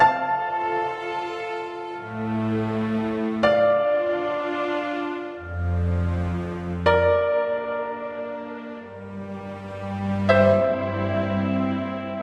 Put together a quick loop in Ableton Live. Goal was to create a loop that had only 2 instruments, had a warm feeling, and had heroic or memorial feel to it. I don't think I'm too happy with it, hope you'll find better use for it than I will..